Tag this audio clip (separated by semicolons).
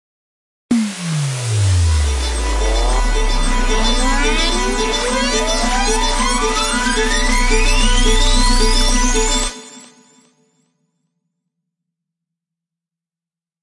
instrument; instrumental; fx; podcast; electronic; effect; imaging; trailer; riser; slam; mix; drop; noise; send; fall; chord; dub-step; music; intro; stereo; radio; soundeffect; jingle; loop; radioplay; broadcast; sfx; deejay; dj; interlude